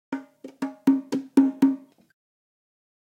JV bongo loops for ya 1!
Recorded with various dynamic mic (mostly 421 and sm58 with no head basket)
samples, loops, congatronics, Unorthodox, tribal, bongo